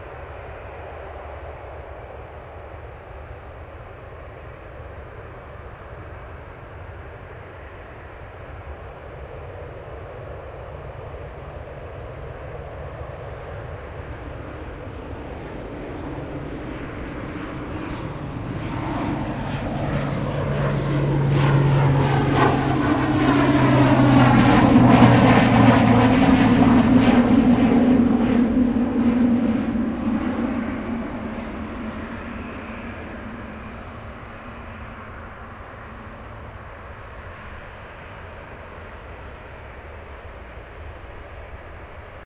The smooth sound of a twin turbo-prop small passenger plane (Saab 340A) as it flies by moments after taking off further down the runway. ~200 meters altitude.